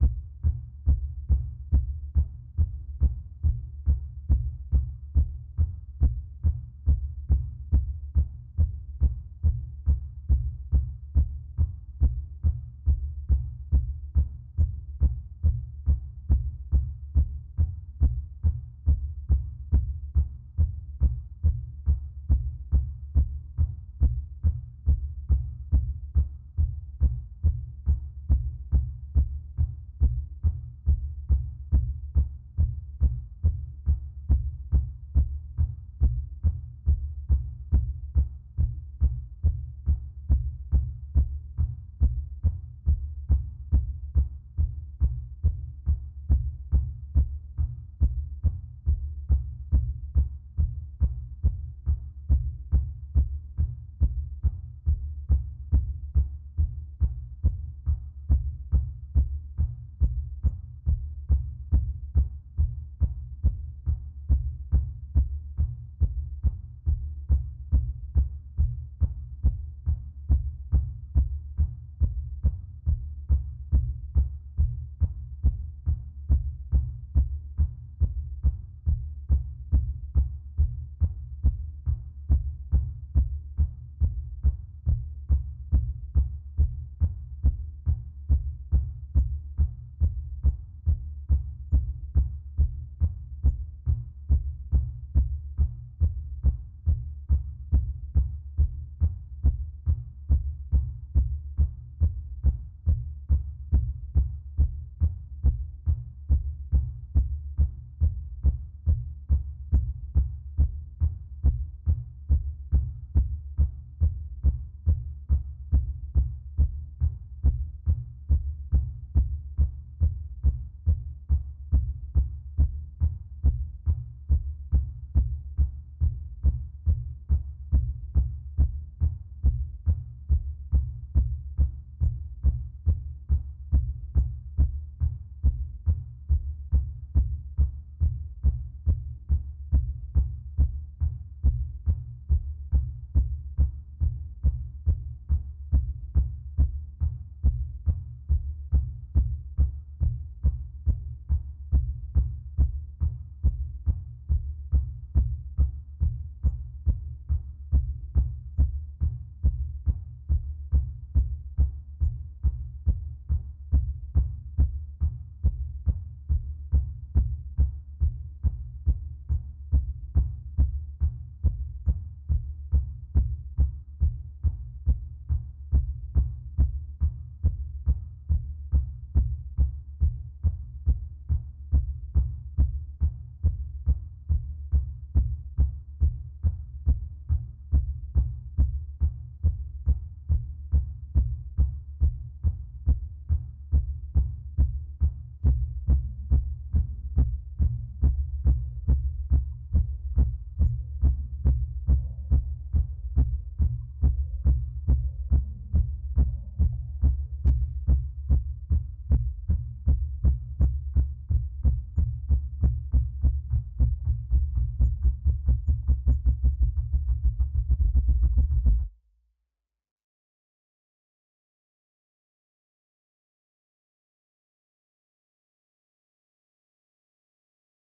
A heartbeat sound I created. Fairly consistent through most of it, and then speeds up into heart-attack mode about 30 seconds from the end.
To make it, I took a kick-drum sample and a snare-drum sample, and made a pattern of kick-snare, kick-snare, kick-snare, over and over. I then EQ'd them to be less distinct and drum-like, added tape saturation to increase the low-end thumping, a small chorus effect and some compression to get rid of the drums' attack...basically just over-processed the hell out of it. Then I used a plugin called Wok M-ST to get a fake hard-panned stereo effect.
My goal was to make it sound like your heartbeat sounds in your ears when you've been running too fast for too long. I think it sounds cool.